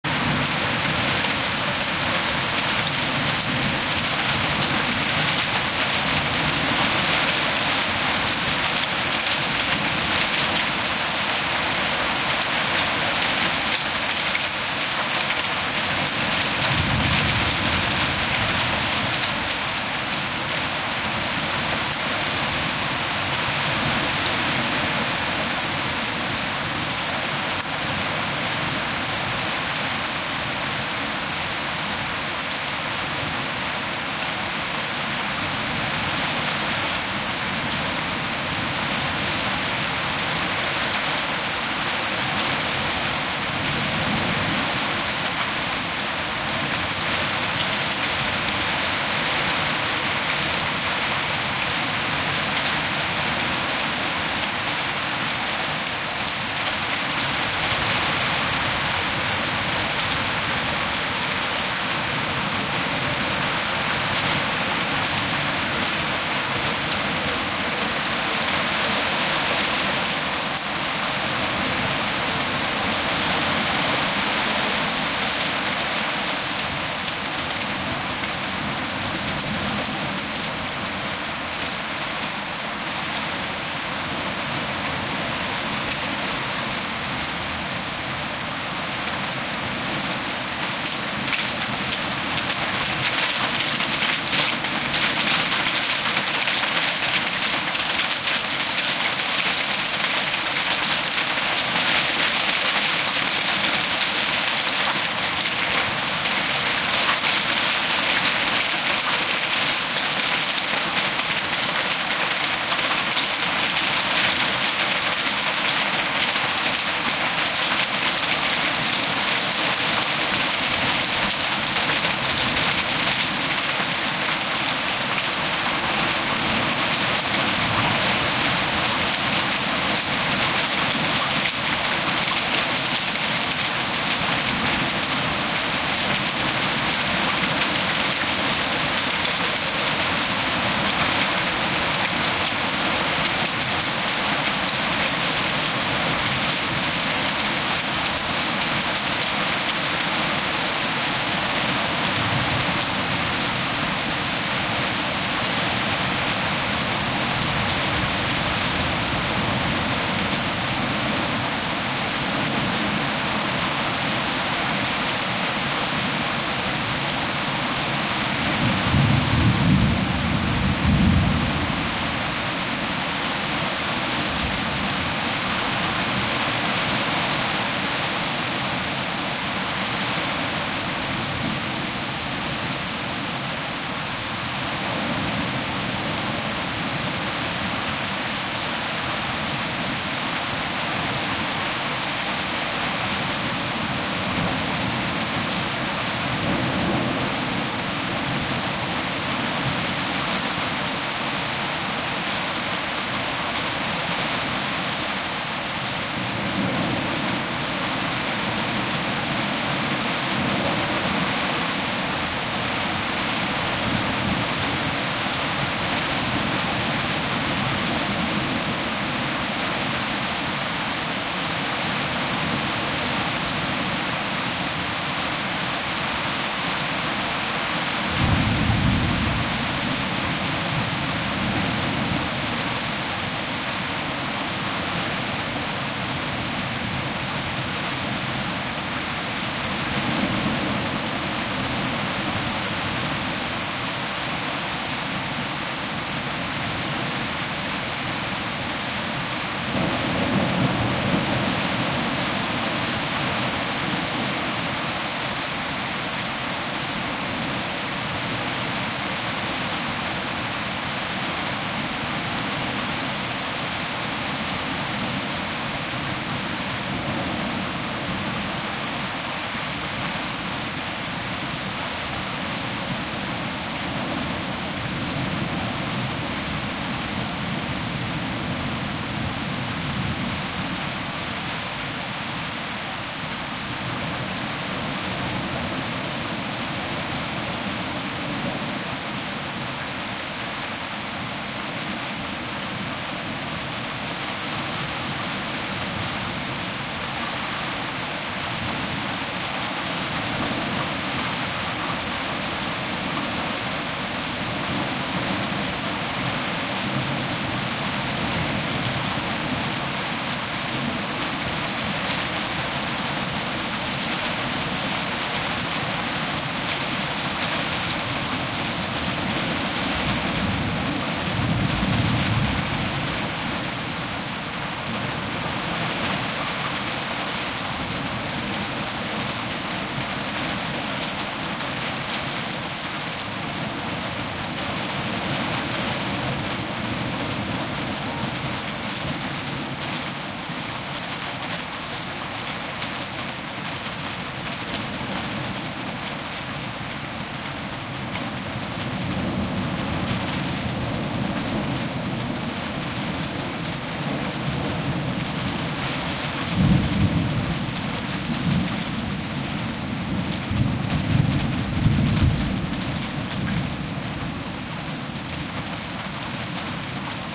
powerful rain, thunder and hailstorm
i recorded in Germany 2013.
A powerful storm including rain, thunder, hailstorm
deutschland, germany, gewitter, hagel, Hagelsturm, hailstorm, nature, rain, regen, relax, relaxation, sleep, sound, thunder, thunderstorm